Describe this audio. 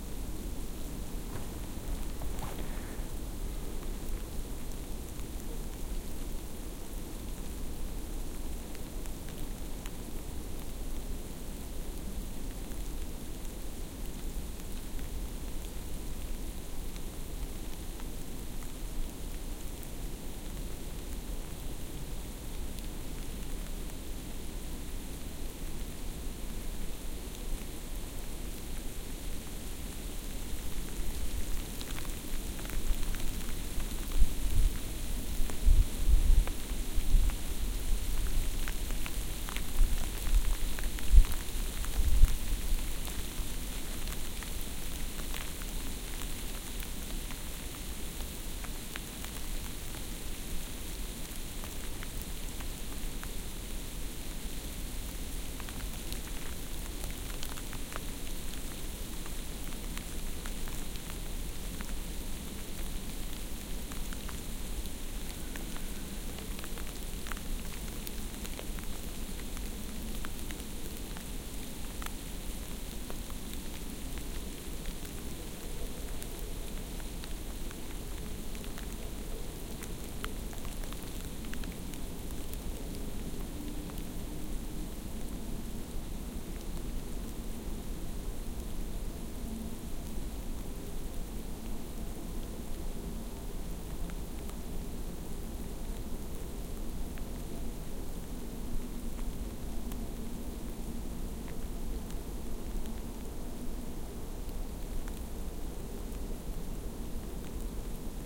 snowing in Dresden-Heide
forrest, winter, field-recording, wind, heide, dresden, snowing